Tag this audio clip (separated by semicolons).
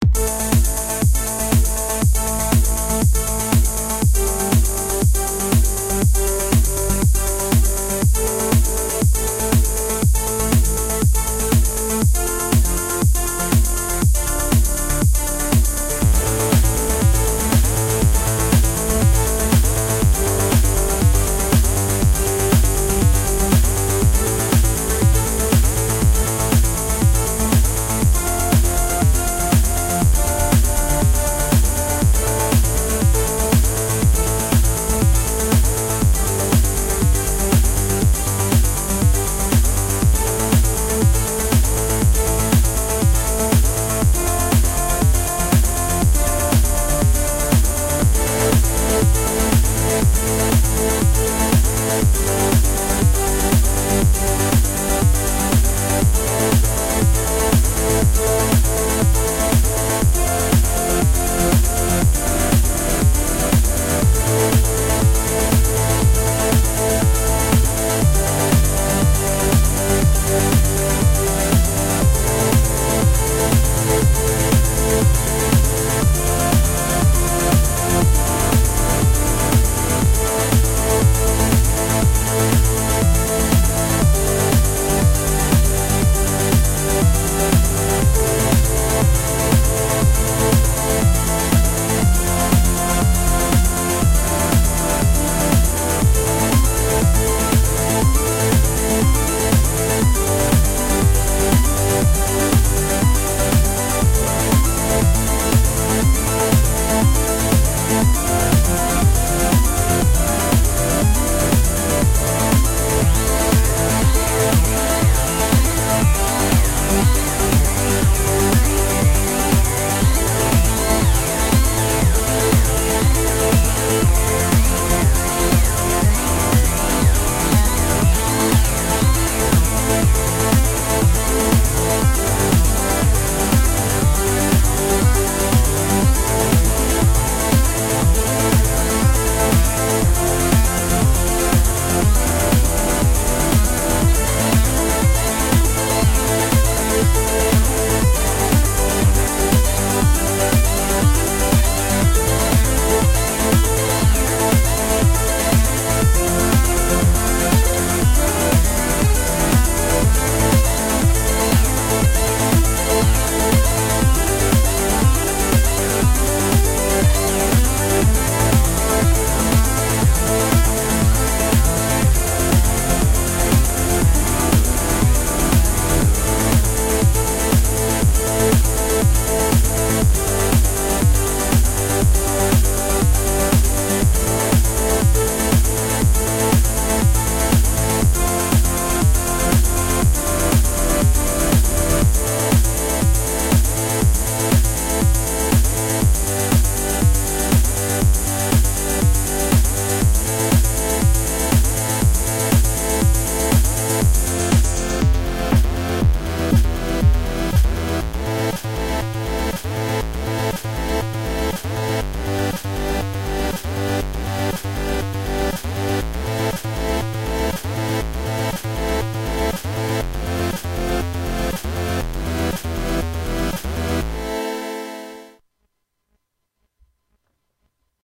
analog; arturia; chip; chiptune; dance; digital; edm; electro; electronic; experiemental; glitch; hardware; house; korg; live; lofi; loop; novation; synth; synthwave; techno; trance